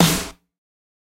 snare dnb 1
made by mixing synthesized sounds and self-recorded samples, compressed and EQ'd.